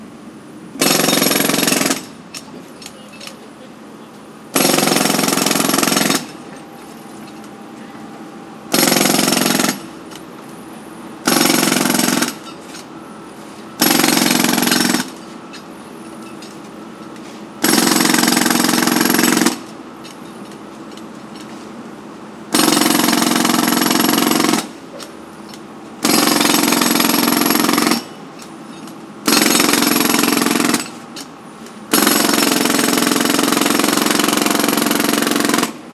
A jackhammer tearing up the pavement, short bursts of loud noise. Recorded on a mini-DV camcorder with an external Sennheiser MKE 300 directional electret condenser mic.
noise, streetsound